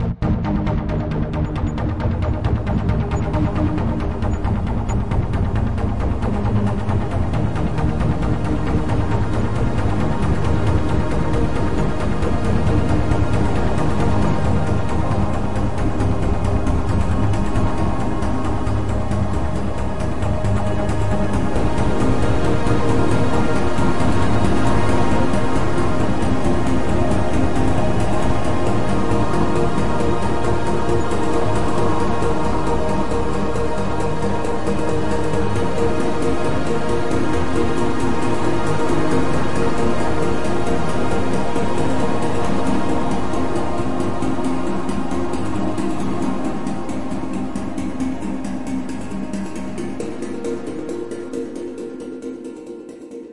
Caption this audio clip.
Three Fast Synth Sounds
A rhythm midi made at the keyboard then processed three times with DN-e1 virtual synthesizer in MAGIX Music Maker daw and the three voices stacked together.
atmosphere, attention-getting, beat, bpm, dance, electro, electronic, experimental, fast, happy, loop, pad, processed, rhythm, rhythmic, synth, synthesizer, upbeat